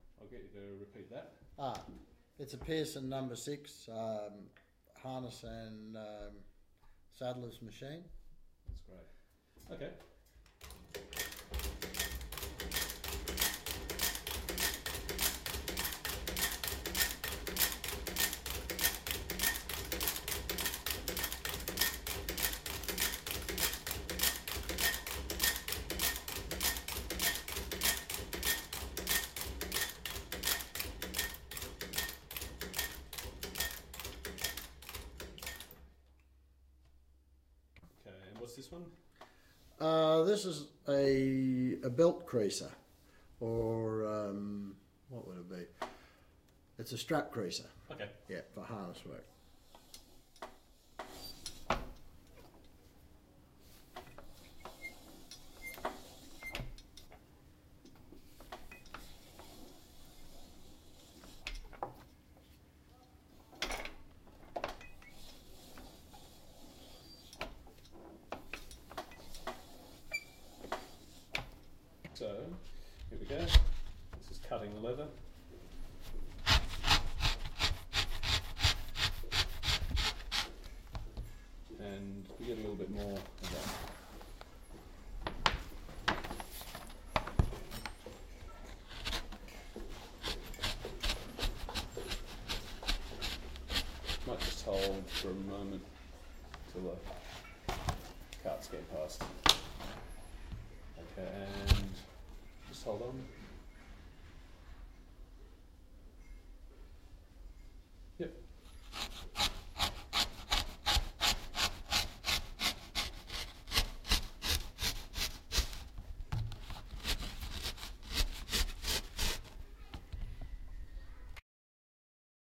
Saddler Working Leather
Spot effects recorded in a recreation of a saddle maker's workshop circa 1850. Includes stitching, creasing and cutting leather with the saddler describing the equipment and process in advance. Original recording, no post processing.